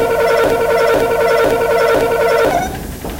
Production steps
This sound reminds printer fax used in pharmacies to scan prescriptions.
This is a mixed sound : an audio recording which was modified on Audacity to achieve this effect.
The original sound comes from the creaking of a faculty door. I selected the part where the squeak was the most acute as well as continuous jerky.
I then added the following effects:
- More bass : -0.6dB
- Speed: x1.126
- Echo: 2 seconds
Finally I repeated the track 5 times.
Descriptif et critères morphologiques :
Typologie de Schaeffer : ce son est une itération complexe (X’’)
Masse : tonique
Timbre: acide
Grain: rugueux
Allure: mécanique (régulier)
Couleur : Froide et opaque
Profil : mélodique: industriel
Dynamique : agressive, stressante